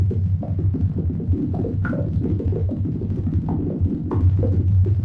THe DIg
deathcore, e, fuzzy, glitchbreak, h, k, l, love, o, pink, processed, small, t, thumb, y